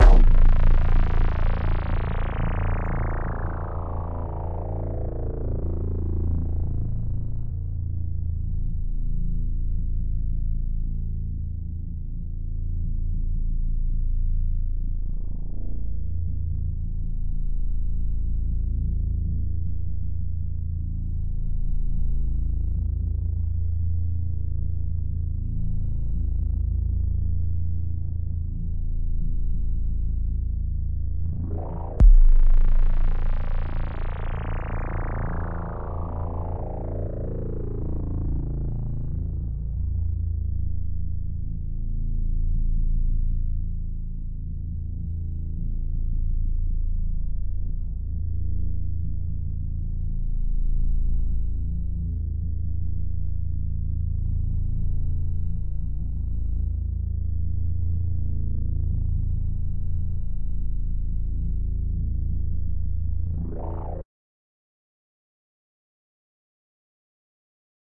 Basspad (Blown To 8-Bits)
A bass synth I produced on Figure